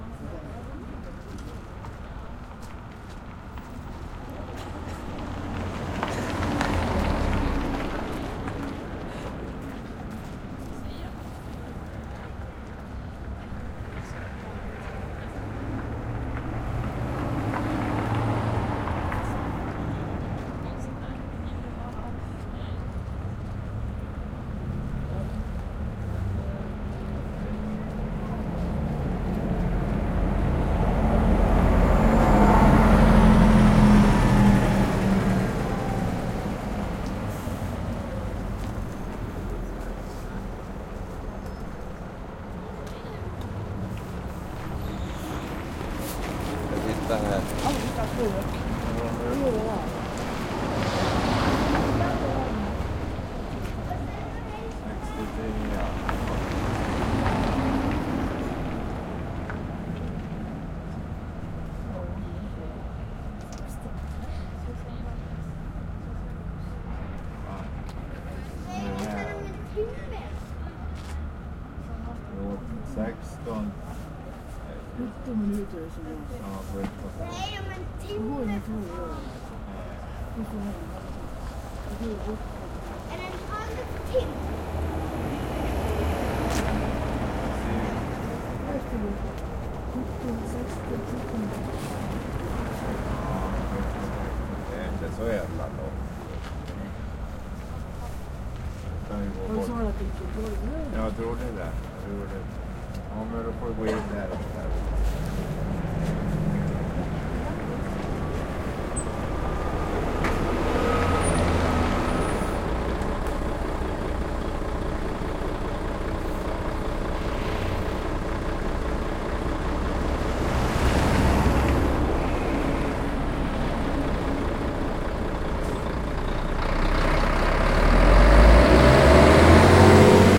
A sound of the local busstation in my city. Some people are talking and busses are coming and going.